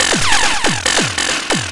140bpm Jovica's Witness 1 4
electro, weird, 140bpm, experimental, jovica